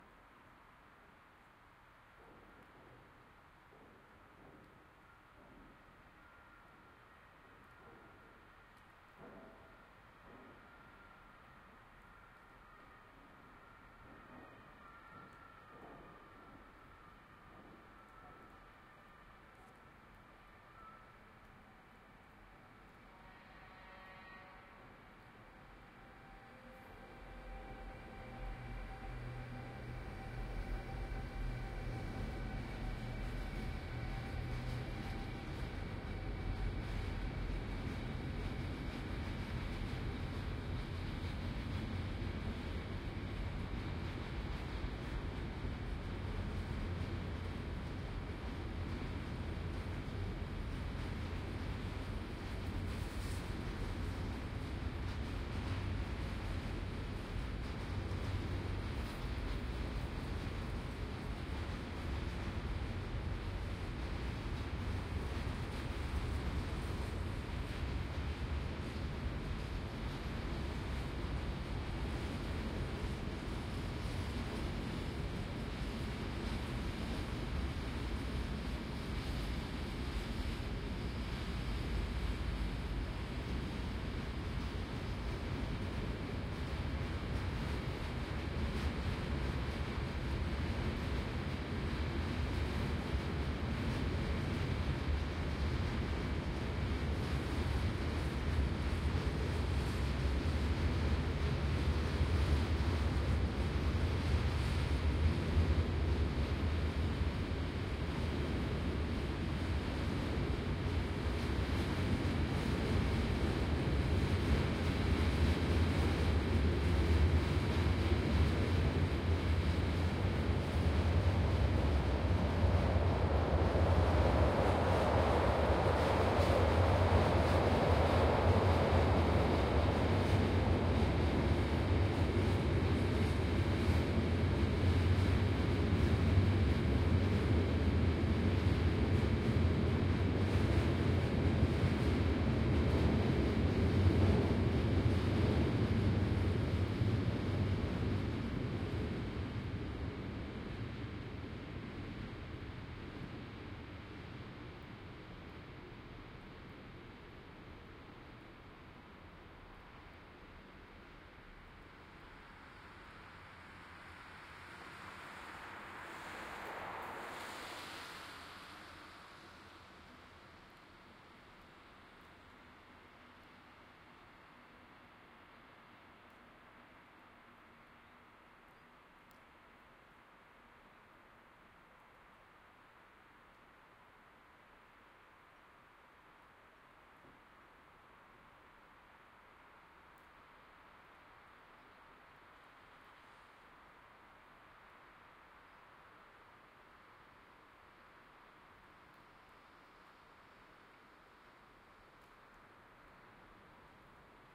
underneath those railwaybridges
Short recording of what it sounds like standing underneath two railwaybridges at the same time with trains passing over them.
Edirol R-09HR with the Soundman A3 adapter and the OKM microphones.
spooky, trains, railwaybridge, rail, train, binaural, hamburg, field-recording, rain